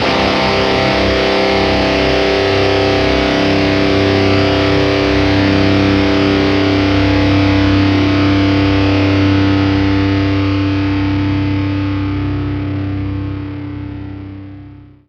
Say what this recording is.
C2 Power Chord Open
Distortion, Melodic